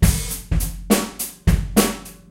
Rock beat loop 2
Some open hi-hat beat and syncopated snare here.
Recorded using a SONY condenser mic and an iRiver H340.